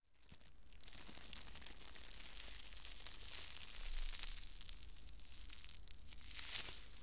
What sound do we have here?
13.Cabeza araña Spider head
creapy spider head turning, made using a plastig bag
bag, creapy, plastic, spider